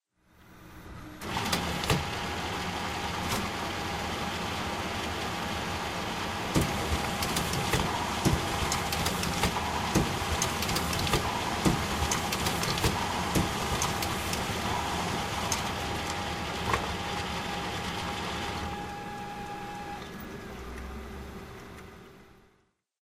photocopier copying
A photocopier producing 5 copies.
office, photocopier